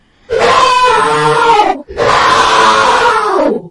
Demon crying no
ghost, scary